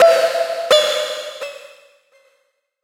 Blip Random: C2 note, random short blip sounds from Synplant. Sampled into Ableton as atonal as possible with a bit of effects, compression using PSP Compressor2 and PSP Warmer. Random seeds in Synplant, and very little other effects used. Crazy sounds is what I do.
110, acid, blip, bounce, bpm, club, dance, dark, effect, electro, electronic, glitch, glitch-hop, hardcore, house, lead, noise, porn-core, processed, random, rave, resonance, sci-fi, sound, synth, synthesizer, techno, trance